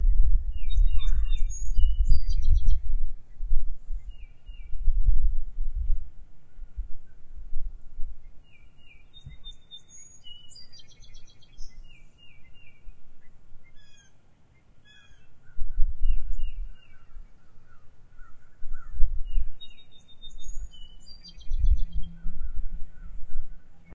Beethoven Bird - self-explanatory. Three chirps: at beginning of recording, at -14 seconds and -4 seconds. Recorded with Tascam DR-40 and reprocessed to remove background noise. If anyone knows the species for this distinctive call, please post.